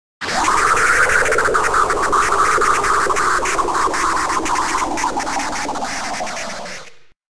A small collection of audio clips produced on Knoppix Linux system.
I've been doodling with sounds for about 4-5 yrs. I'm no professional, just a semi-weird guy that likes to make weird sounds! LOL!